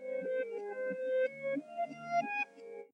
MATIAS Manon 2015 2016 son1
flashback; past; weird; remember
movie, s, backward, a, past, It, discovered, sound, probably, strange, scene, indicates